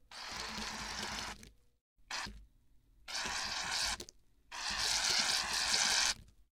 Shaving cream spray
shaving cream dispenser long and short
aerosol, can, spray, spray-can, whipping-cream